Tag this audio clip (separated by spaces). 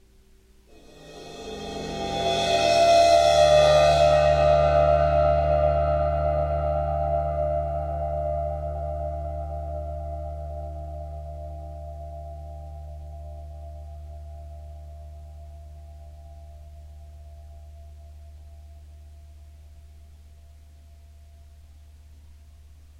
ambiance ambient atmosphere bowed-cymbal overtones soundscape